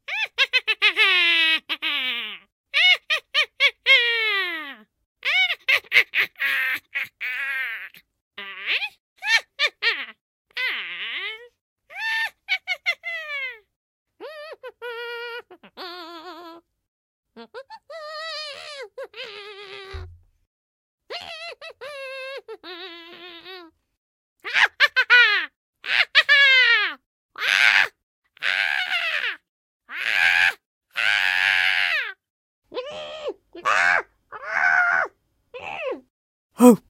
Bruja / Witch
Una amiga grabando varios efectos de sonido para el personaje de una bruja: risas, canturreos y gritos variados :)
A friend recorded some witch sound effects for a project: laughter, pseudo singing and assorted screams :)
Equipo / Gear: Behringer mic, presonus preamp, m-audio audiophile
halloween, cartoon, risotada, mujer, girl, bruja, witch, voz, funny, voice, humor, divertido, vocal, woman, laughter, female